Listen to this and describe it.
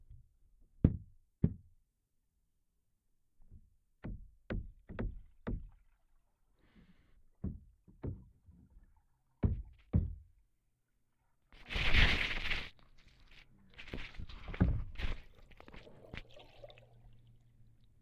boat noises paddling in lake lbj 08232013 1
Noises recorded while paddling in lake LBJ with an underwater contact mic
aquatic, contact-mic, dripping, gurgling, rowing, shuffling, underwater